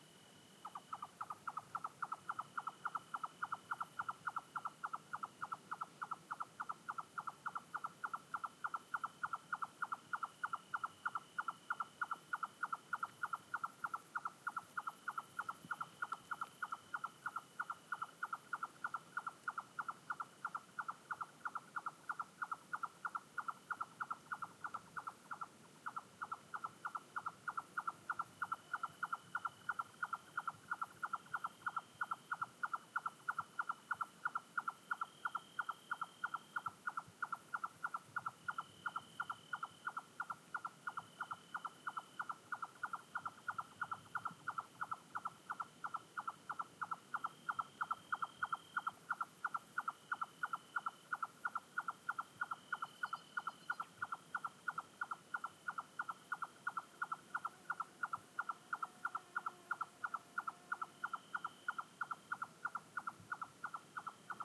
20060706.twilight.scrub
this was recorded at twilight inside the scrub, a not very hot summer day. You can hear crickets timidly starting to sing and a distant nightjar calling obsessively.
Rode NT4 > Shure FP24 > iRiver H120(rockbox)
ambiance, birds, field-recording, insects, nature, night, scrub, summer